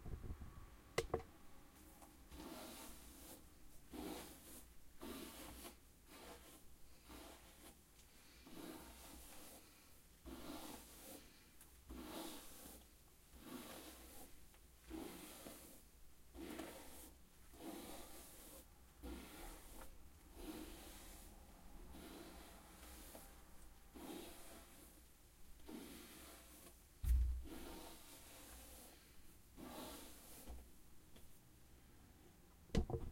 Brushing Hair
The sound of a hairbrush running through a woman's hair.